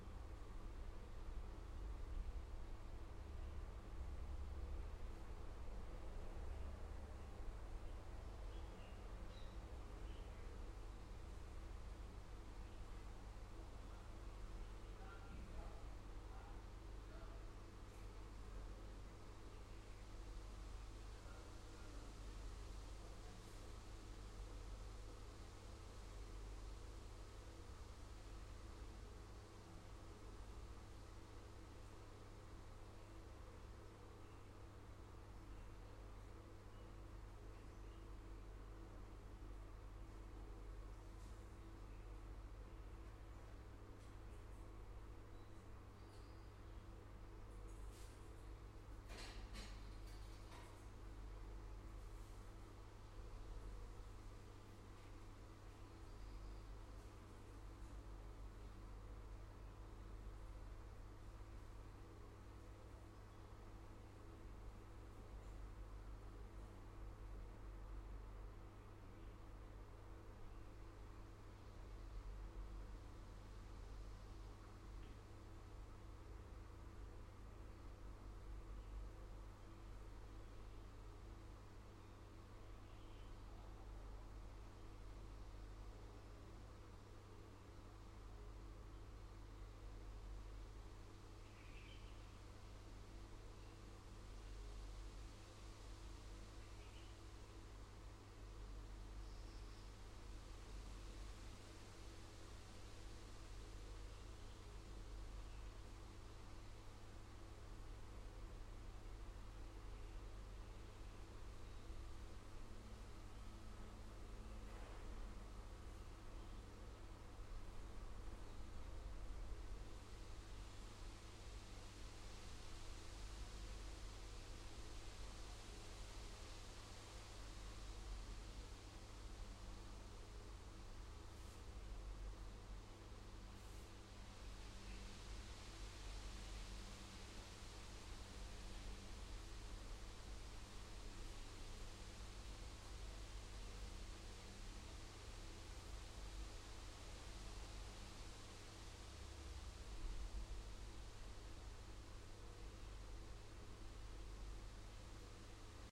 Room Tone of a stairways to a basement
Day, AfterNoon,
you might hear the birds
and some distance human voices